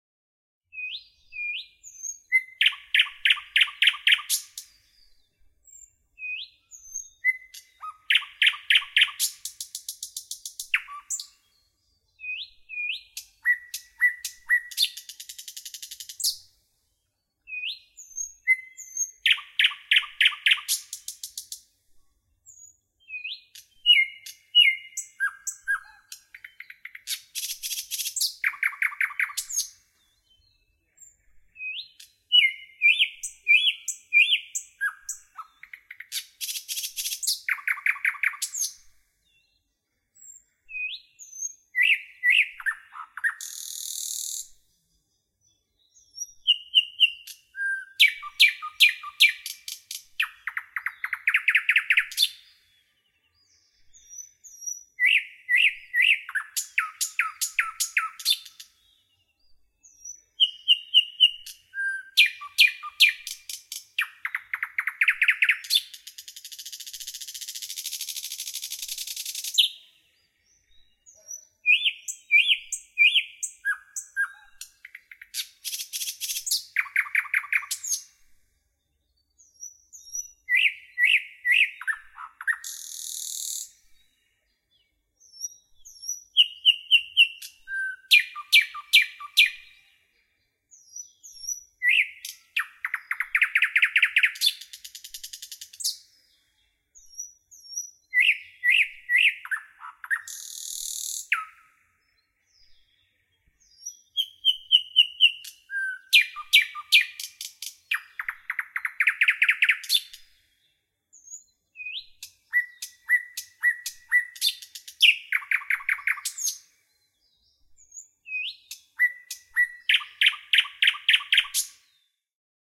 Content warning
A very clear and pure sound of the nightingale song in the forest. Recorded at night in the North-West Russia.
field-recording, bird, nature, spring